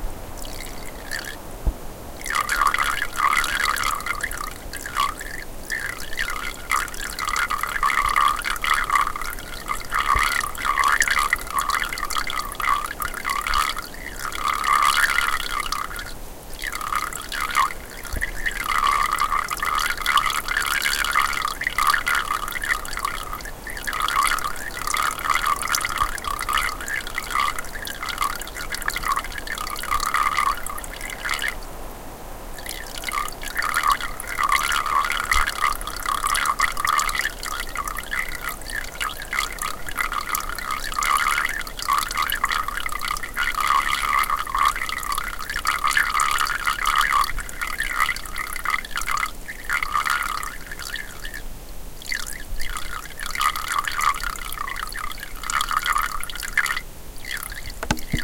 little creek in the woods2
A very little creek deep in the woods in northern Bohemia (Czech republic). The sound is bit weird, squeaking.
Recorded with Zoom H4N and normalized.